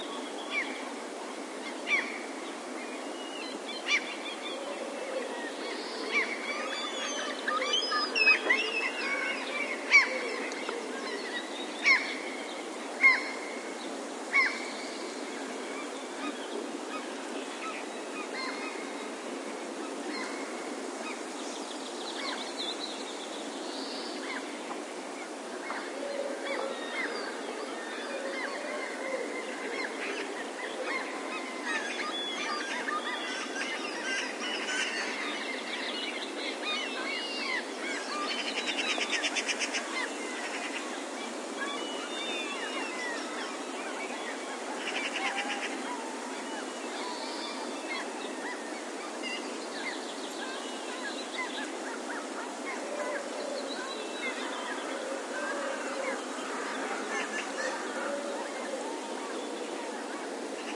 birds early morning
This was recorded in the early morning. Seagulls and magpies are flying around and calling. You can also hear Wood Pigeons and Greenfinches. Recorded with a Zoom H2.
atmosphere, birds, field-recording, forest, greenfinch, magpie, morning, norway, pigeon, seagulls